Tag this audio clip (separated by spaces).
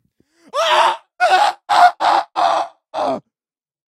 fx laughter processed